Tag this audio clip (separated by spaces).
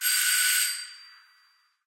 hinge squeak door